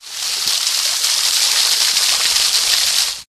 memorial waterfall
Memorial Day weekend rain and thunderstorm recordings made with DS-40 and edited in Wavosaur. Water pouring off the roof into a temporary plant filled pond.
ambience, rain, storm, thunder